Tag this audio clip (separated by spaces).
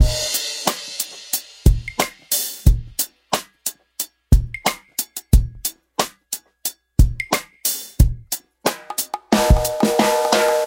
090 14 A Bmin Modern Reggae Roots Samples